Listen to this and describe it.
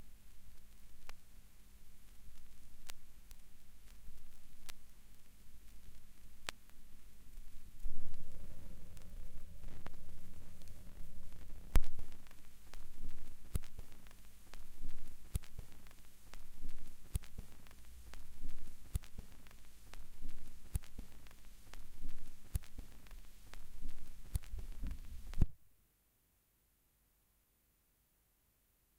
End of side A of a brand new album. Signal recorded through line.